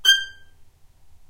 violin spiccato G5
violin, spiccato